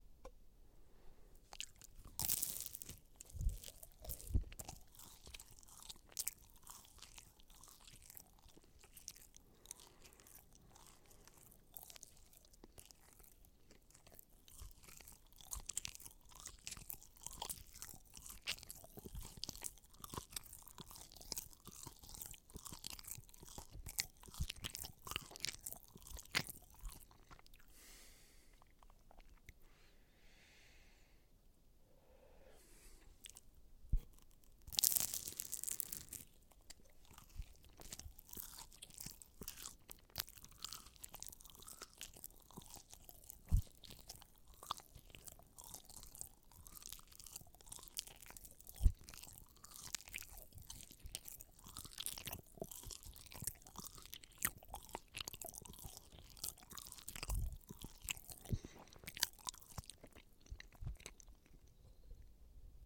Recorded with Zoom H6 portable Recorder and native Shotgun Mic.